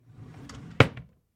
Drawer-Wooden-Empty-Close-02
This sound was captured from a small bed side dresser. I emptied the drawer before recording to get a more resonant sound. When it was full of socks it had a very dead and quiet sound that would be relatively easy to imitate through some clever EQing.